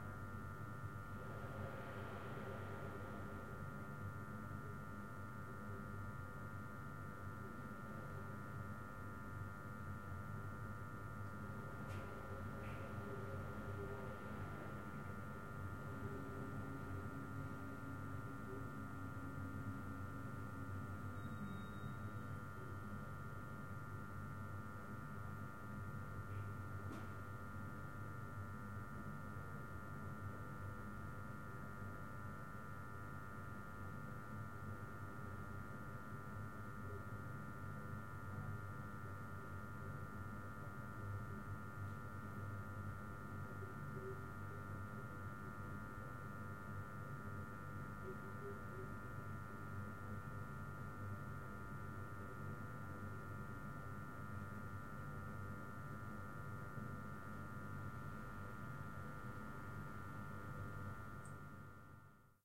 Room Tone Small Electrical Buzz
Room, Small, Buzz, Tone, Electrical